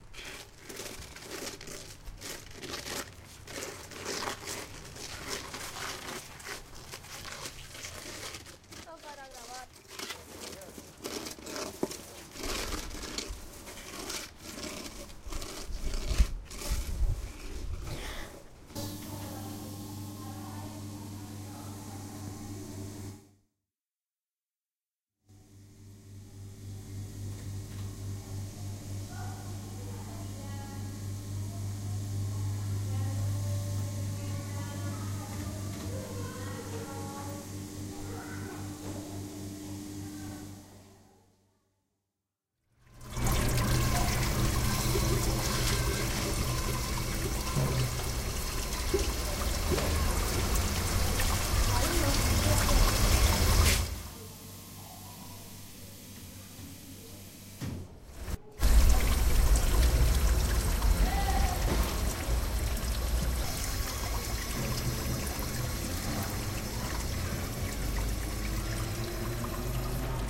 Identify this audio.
Sonic Postcard AMSP Alfredo Nerea
Barcelona, AusiasMarch, Spain